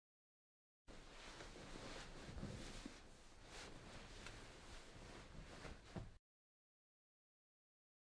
The sheets move as the person shuffles in bed. A soft, muffled sound of the sheets. Recorded with a zoom H6 and a stereo microphone (Rode NTG2).